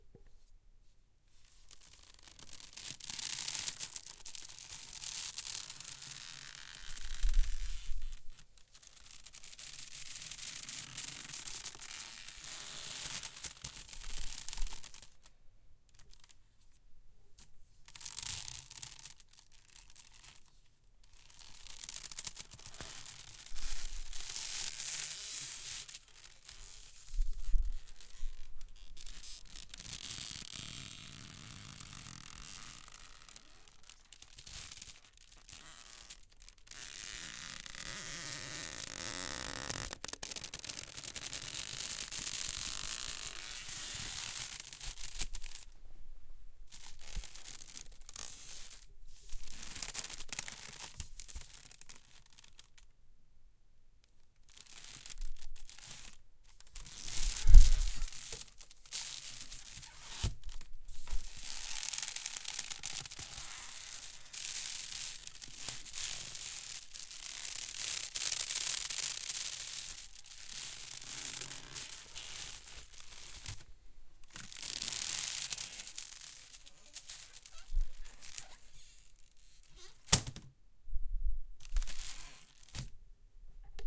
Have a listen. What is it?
Basket Creak
Recording of a wicker basket being opened and closed, and stretched. I use this sound effect in animation for natural things growing and stretching, like plants growing quickly
Recorded with a Zoom H4n